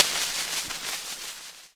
IR wax morewaxier
Some processed to stereo artificially. Wax cylinder sounds.
historical, impulse, response, vintage